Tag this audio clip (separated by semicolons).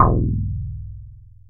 bass; multisample; reaktor